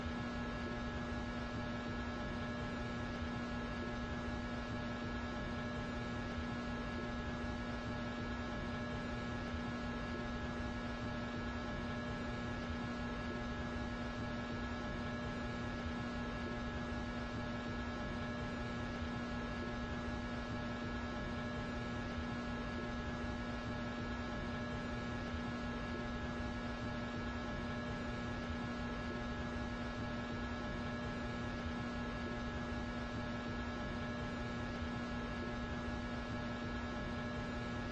Fluorescent lightbulb hum buzz (Extended)
The hum buzz of an old fluorescent lightbulb in an office workspace. Could be used in something that has to do with level 0 of the backrooms.